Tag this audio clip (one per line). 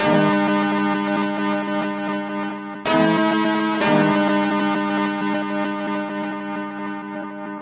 broken
lo-fi
loop
motion